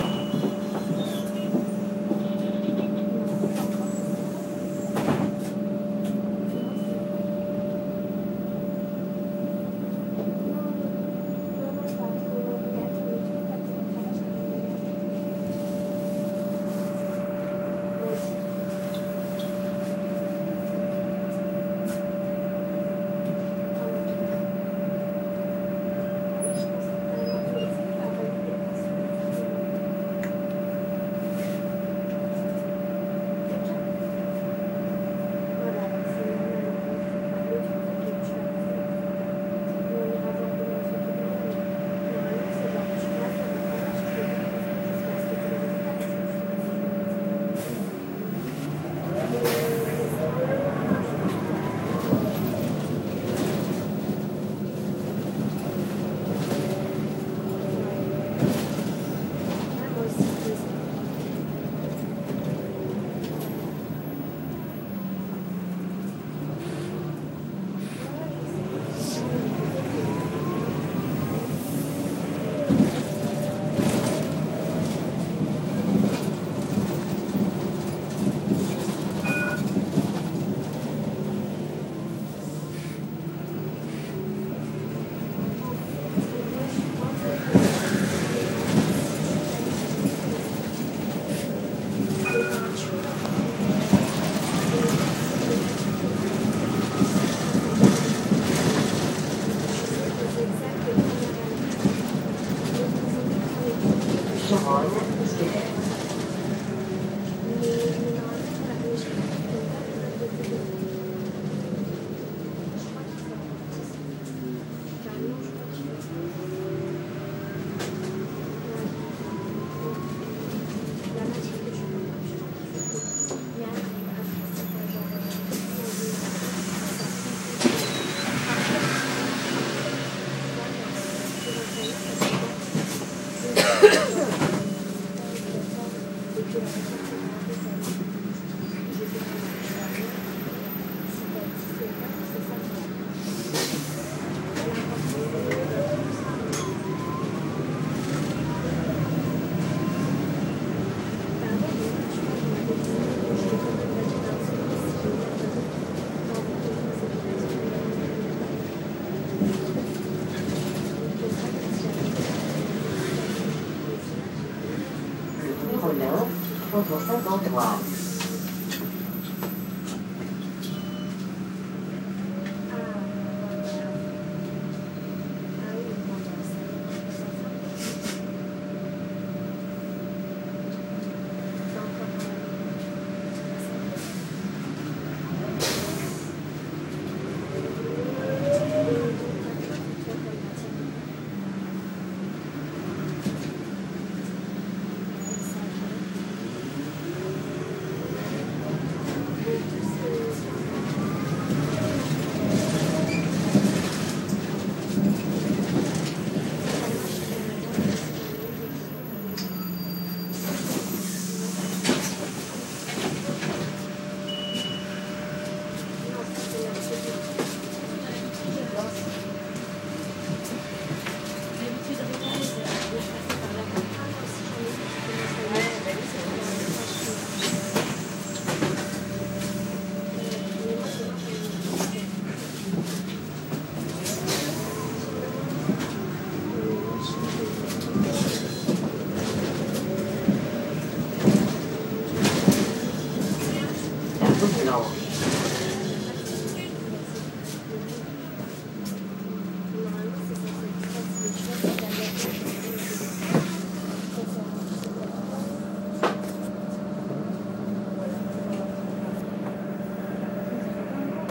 ParisBusJourney16bit
long mono recording on iphone4 of a bus journey in Paris
Paris, vehicle, transportation, public, bus